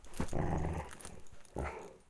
Some deep growls from the family dog as we play tug of war with her favorite toy. She has a very sinister, guttural growl that is betrayed by her playful intentions. In the background, you can hear the metal leash rattling on her neck.
animal, dog, growl, guttural, play